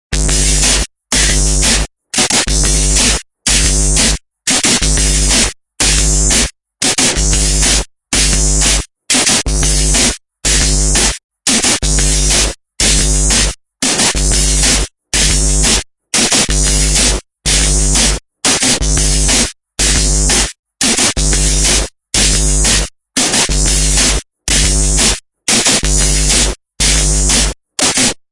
This is a recording I made for one of my songs. I found it nice to run the group's output through the Ohmforce Ohmicide and have some fun with the separate bands.
The original loop consists of me, drumming on my metal kitchen-sink with drum-brushes. The snary accents are the brushes on the back of my acoustic guitar. All recorded with a Neumann U87 and mangled through a UA SOLO610 with tube-gain cranked up. The bassdrumsound is also an handplayed sound from my NORD LEAD.
7, 8, aggressive, bass, distortion, feedback, idm, loop, mad, noise, nord-lead, ohmicide